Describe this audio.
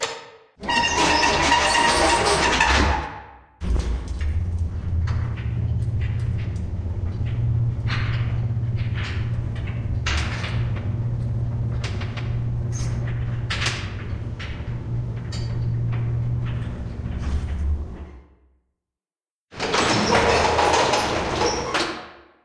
Composition of sounds of what an elevator ride sounds like in old Ukrainian buildings.
0,00 - 0,55: Button
0,55 - 3,60: Door close
3,60 - 19,50: Ride
19,50 - 22,45: Door open